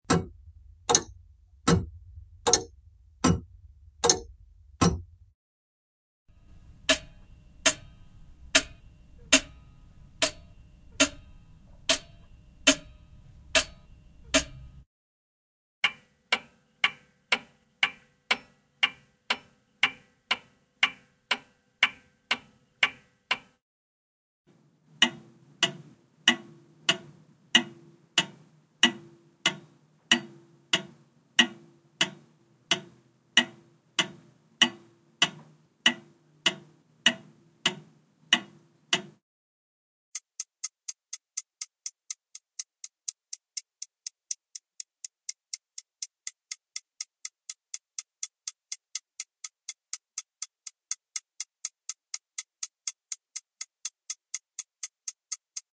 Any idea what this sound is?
Clock ticking
Selection of old clocks. all recorded in our own studio. Recording date december 2010
ambience, antique, clock, clockwork, grandfather-clock, loopable, mechanical, mechanism, old, pendulum, ticking, ticks, toy, wind-up